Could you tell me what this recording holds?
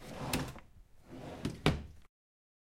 Draw Open Close 1
A wooden draw opened and closed.